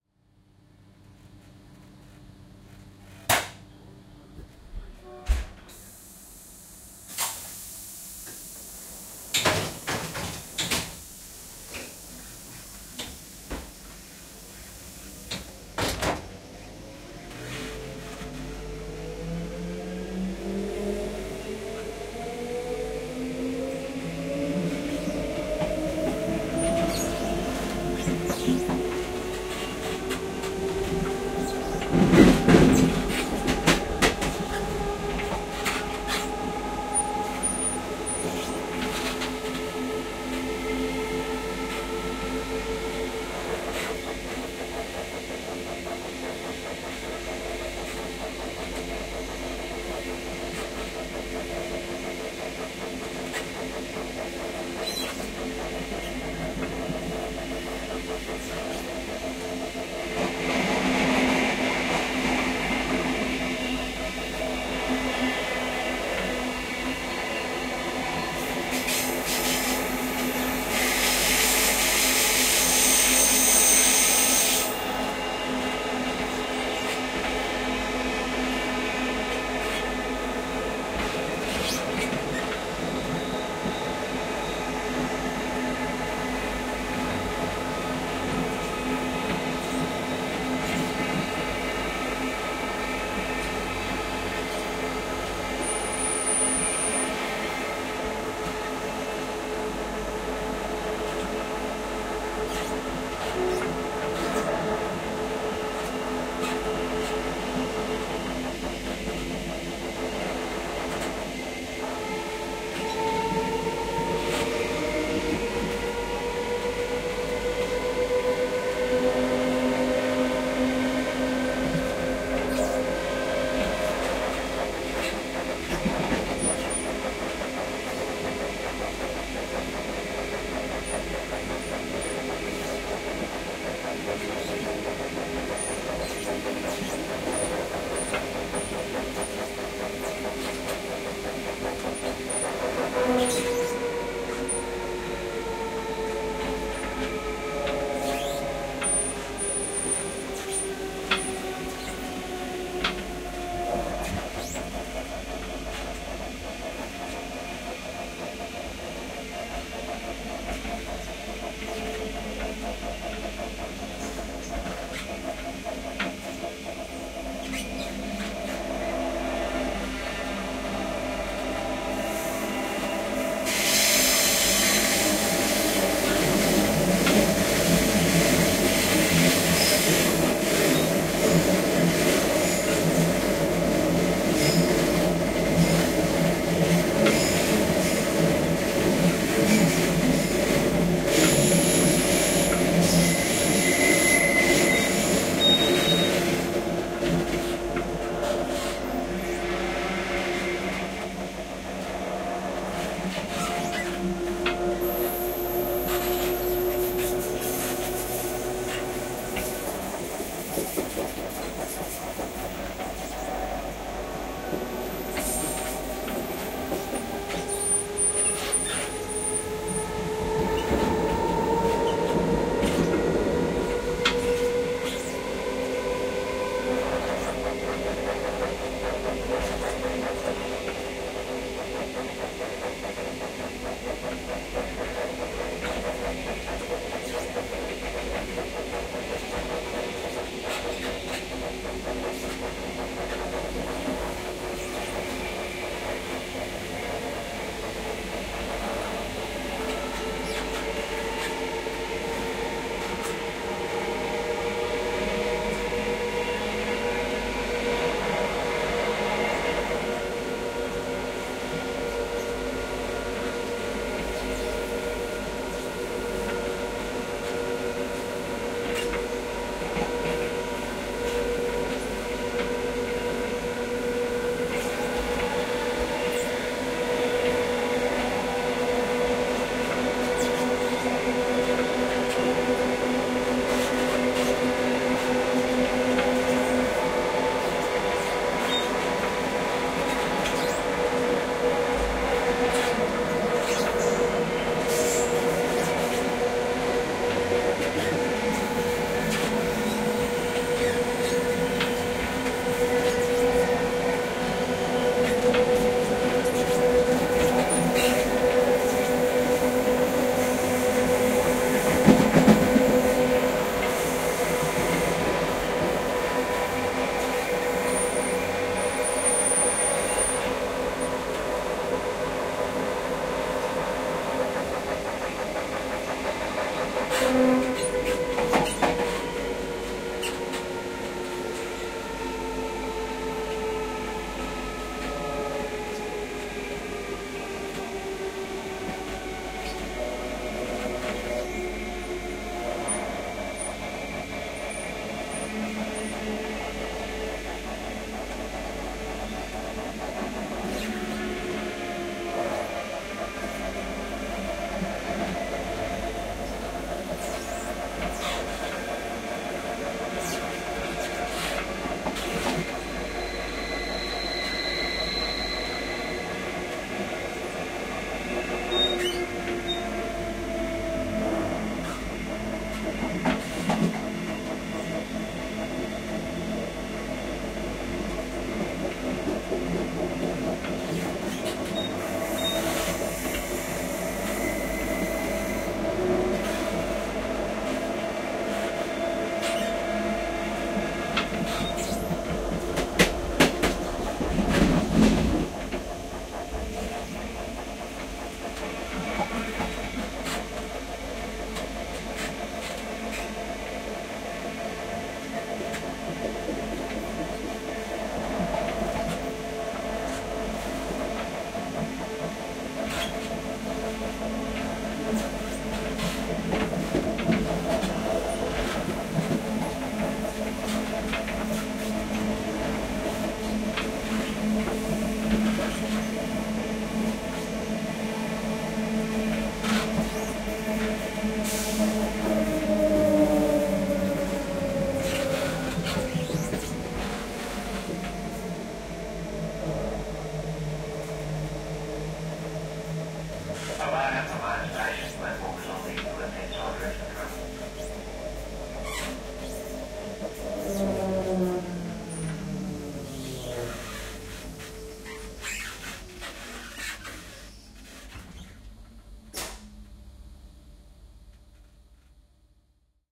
Train Trip Loud 2
Recording of a long train trip in an electric tilt train, captured between the carriages.
Recorded using the Zoom H6 XY module.
announcement, close, electric, emu, fast, loud, motor, rail, railway, station, track, train, travel, trip, whine